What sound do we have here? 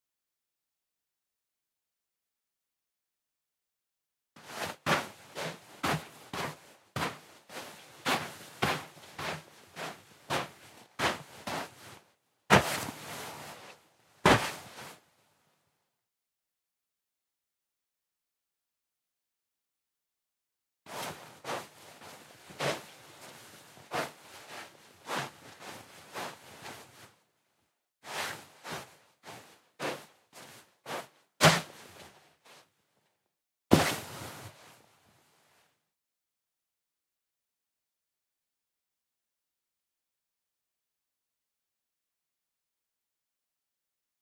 FX Footsteps Sand or Snow 01
foot, footstep, shoe, step, walk